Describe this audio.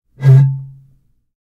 Bottle end blow whistle 1
Short blow into emty wine bottle